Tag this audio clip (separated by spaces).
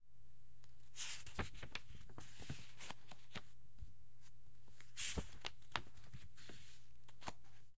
book page pages paper turn turning